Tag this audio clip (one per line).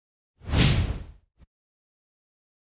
luft
swhish
woosh
whip
punch
fighting
wish
swing
swish
wisch
air
swoosh
wind
swosh
attack
whoosh
swash